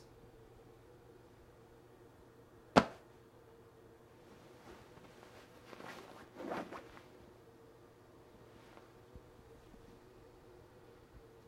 Pillow hit
Sound of head falling on a pillow.